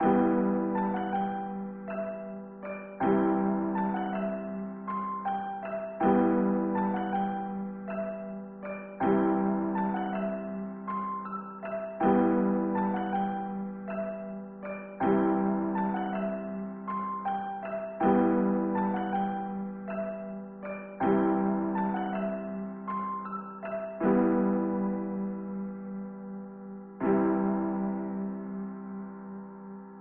Piano Loop Em 160 BPM
160, bpm, chill, Em, EMinor, hiphop, jazz, lo-fi, lofi, loop, loops, melody, music, nostalgic, pack, packs, piano, pianos, relaxing, sample, samples, sound